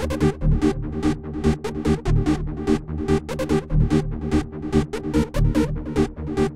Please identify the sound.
beep line
146bpm, dance, loop, techno, trance